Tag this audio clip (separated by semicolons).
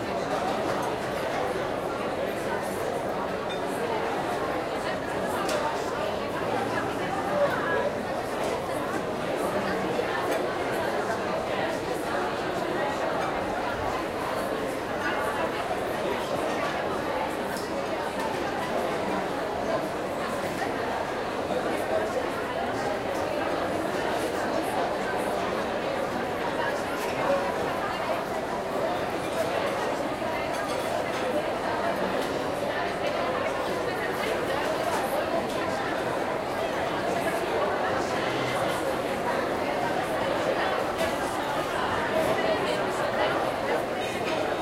people-talking; field-recording; lunch